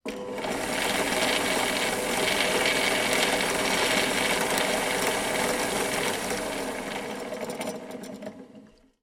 Drillpress running free no load meduim whirring gritty fan rattle no switch 2
dripp press running, not drilling holes. There is a prominant rattle in this clip